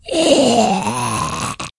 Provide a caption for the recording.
Zombie dies 1
Sound of zombie dying.
moaning, growl, creepy, snarl, roar, moan, hiss, horror, zombie, undead